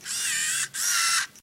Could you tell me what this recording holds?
MinoltaV300Zoom2
Zooming the lens/focusing on a Minolta Vectis-300 APS film camera. There are several different sounds in this series, some clicks, some zoom noises.